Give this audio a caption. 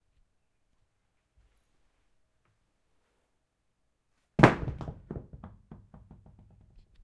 Weights dropped
Heavy rubber weights impacting tile floor
Floor, Impact, OWI, Rubber, Weights